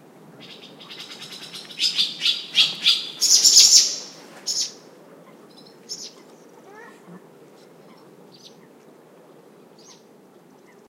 20070224.angry.swallow
a swallow caught coming out of a tunnel. Sennheiser ME66 + AKG CK94 into Shure FP24, recorded with Edirol R09. M/S stereo decoded with Voxengo VST free plugin.
swallow, tweet, south-spain, nature, birds, field-recording, winter, marshes